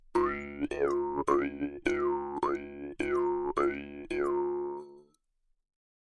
Mouth harp 13 - lower formant short rhythm
A mouth harp (often referred to as a "jew's harp") tuned to C#.
Recorded with a RØDE NT-2A.
foley, formant, instrument, formants, Mouthharp